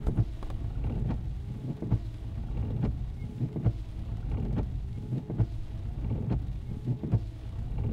Windshield Wipers
Sitting in the car with the wipers going. You can hear the rain and the wipers at a moderate setting.
machine, windshield, wipers, rain, environmental-sounds-research, car